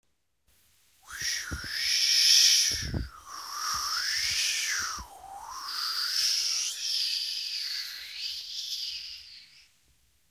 efecto vocal creado en el closet
closet, efecto, vocal